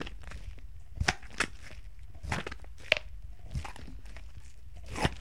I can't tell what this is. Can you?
slime noise 4 1
Slime noises done by J. Tapia E. Cortes
SAC, Mus-152, slime, GARCIA, putty, live-recording, goo